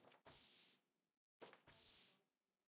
dropping and sliding paper
sound of a paper dropping and sliding on a table
booklet, drop, dropping, paper, slide, sliding, table